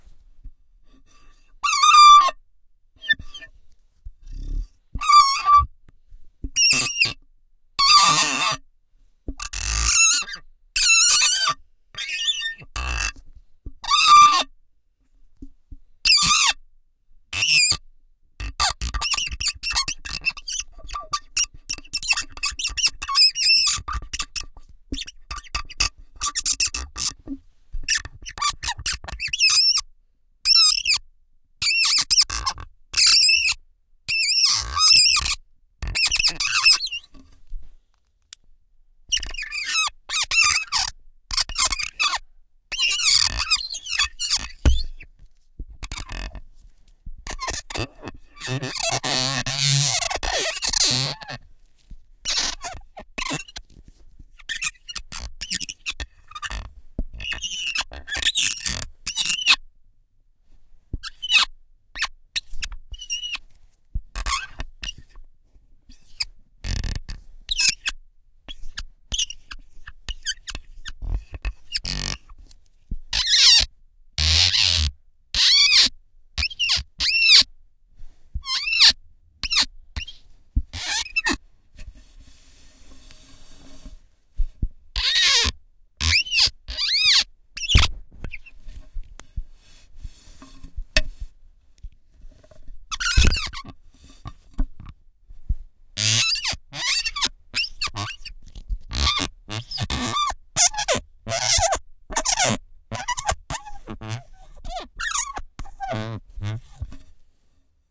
Shivering Sound 05 - Felt-tip pen on glass window
Really unpleasant noises in this pack.
They were made for a study about sounds that creates a shiver.
Not a "psychological" but a physical one.
Interior - Stereo recording.
Tascam DAT DA-P1 recorder + AKG SE300B microphones - CK91 capsules (cardioid)
glass, pen, shiver, unpleasant, window